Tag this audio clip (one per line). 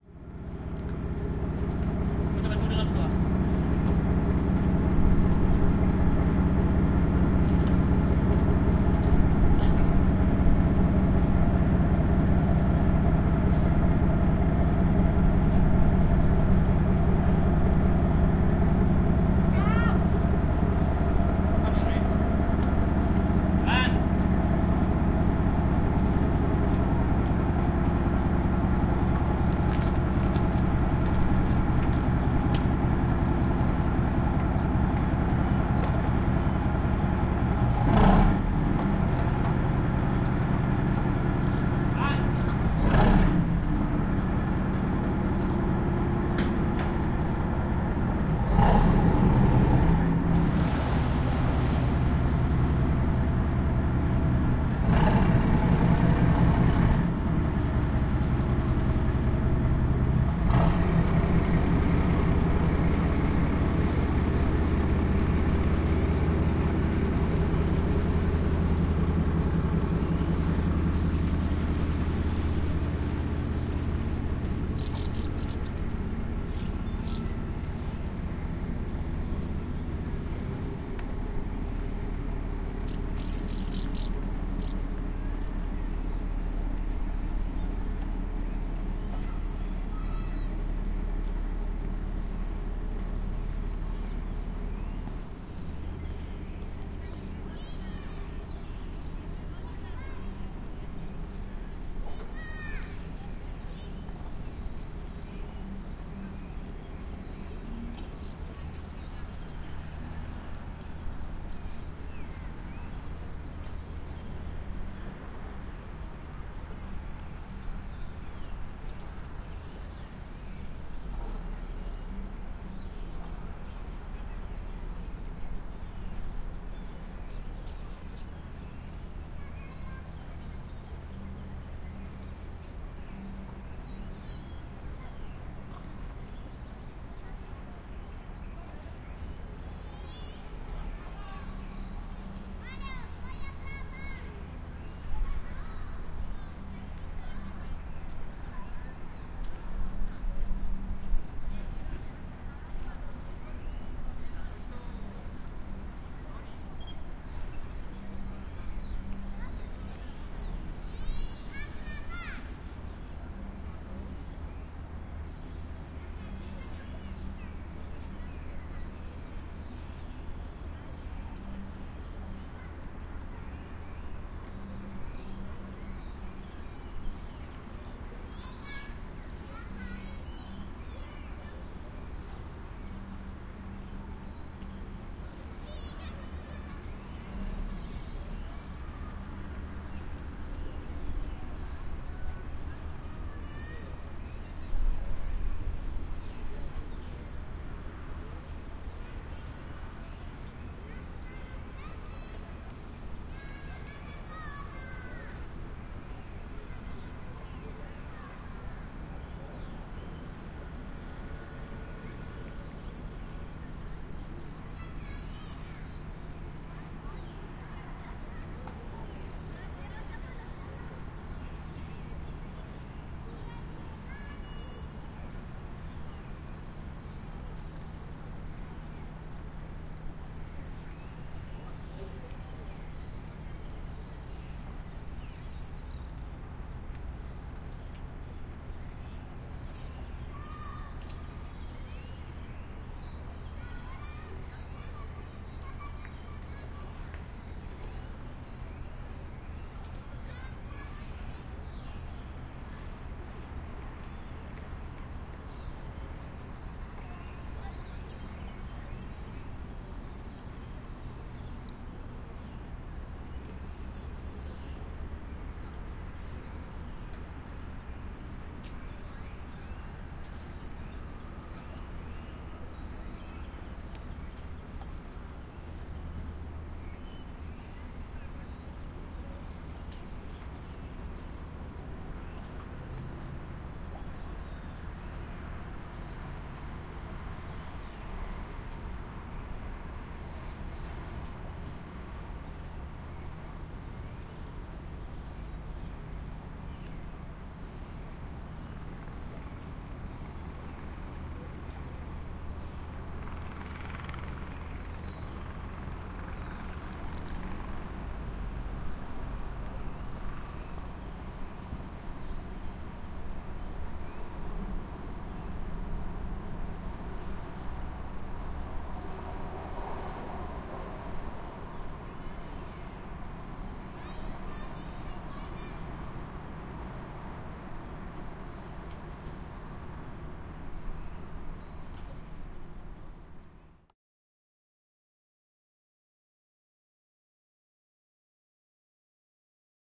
birds
Boat-engine